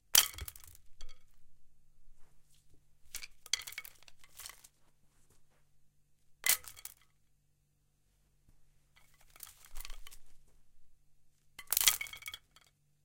wooden sticks or firewood
wood sticks being dropped
being, dropped, firewood, sticks, wooden